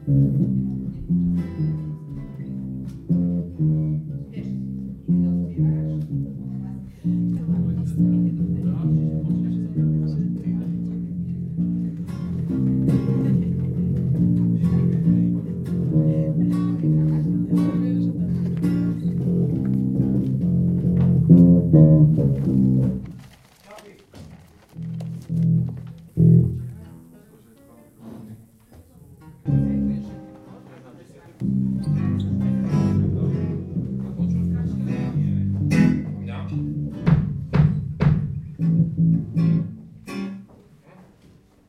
Preparing for music performance. Some bass notes, guitar chords and drum hits. Recorded with Zoom H1 at Music Contest at Košice.